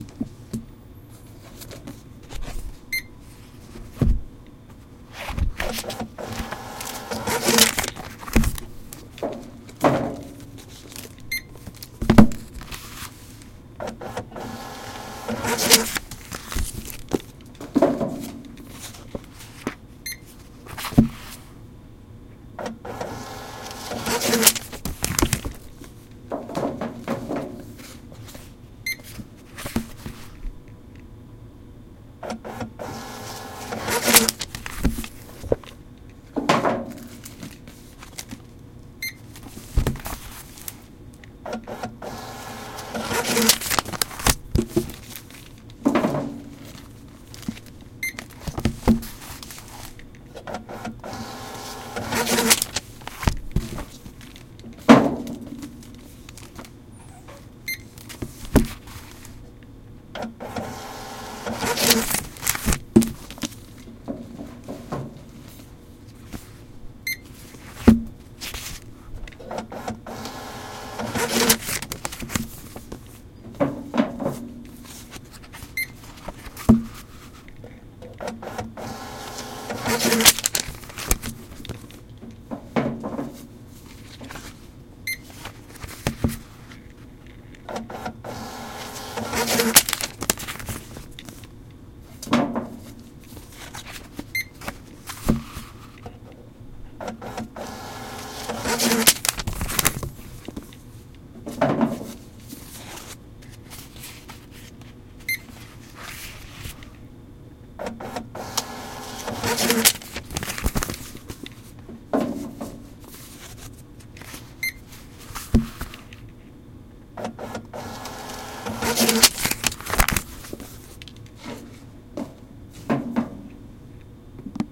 Recording of library books being checked-in and the hold receipt being printed. Some sound of books being de-sensitized. Recored on Zoom H2.